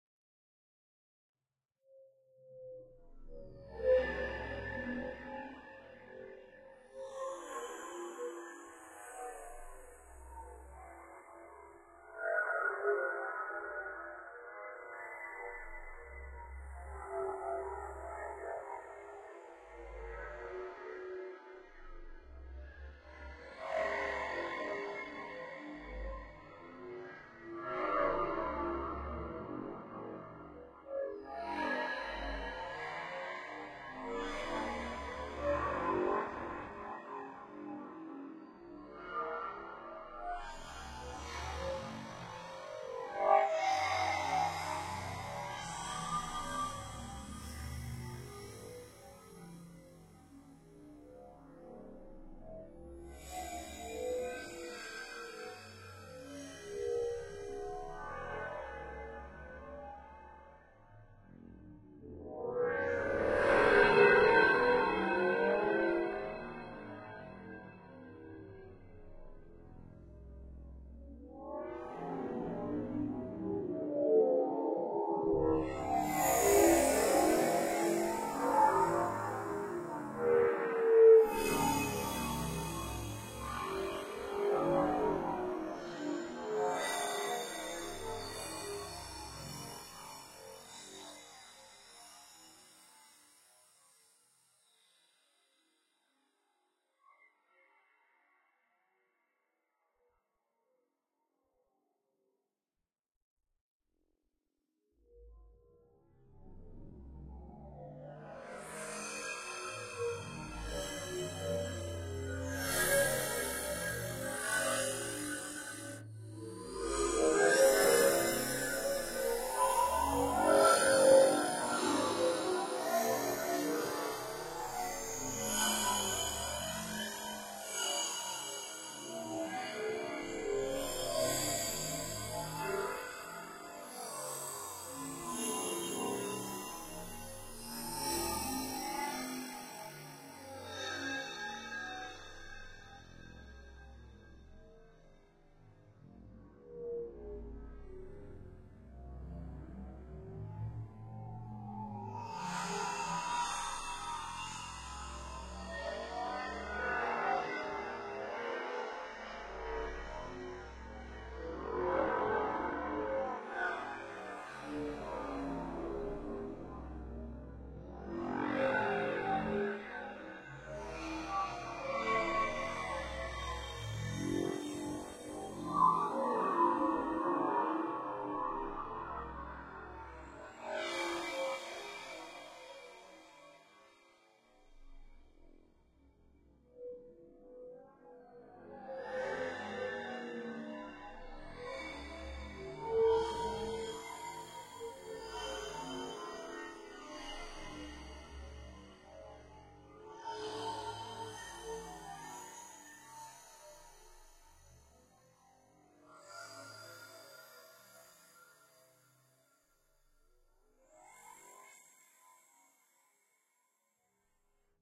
07 barreau bunker x-noise + Corpus + Z-noise
strange sound design, futuristic bassline (maybe). sixth step of processing of the bunker bar sample in Ableton.
Added Waves' X-noise and Z-noise, as well as Ableton's Corpus.
sound-design, glitch, sounddesign, inhuman, fx, strange, future, sfx, bassline